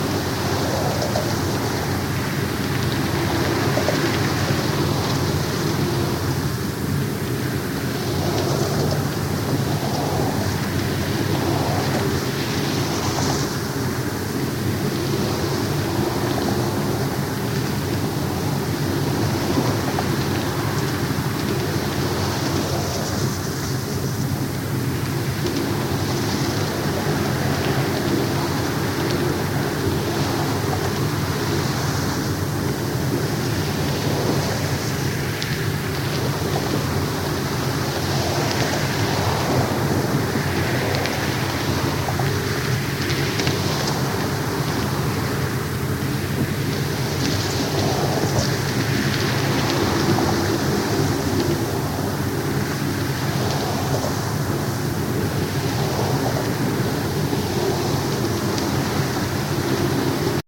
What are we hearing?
GGB 0410 Main Cable CE N
Contact mic recording of the Golden Gate Bridge in San Francisco, CA, USA very near the center of the span. Here you can reach up and touch the main "catenary" cable, which is where I attached the mic. Recorded August 20, 2020 using a Tascam DR-100 Mk3 recorder with Schertler DYN-E-SET wired mic attached to the cable with putty. Normalized after session.
mic, Schertler, DR-100-Mk3, steel, bridge, San-Francisco, Golden-Gate-Bridge, field-recording, cable, Tascam, metal, contact-mic, DYN-E-SET, wikiGong, contact, contact-microphone